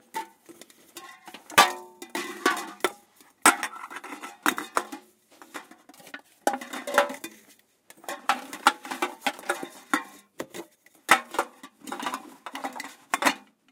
bagging alum cans 1
This is the sound of a person collecting aluminum cans and putting them into a bag.
Equipment:
Midside setup 2x Schoeps CMC 5U with Schoeps MK4 & MK8 capsules
Sound Devices 744T
beverage, hollow, recycle, tinny, trash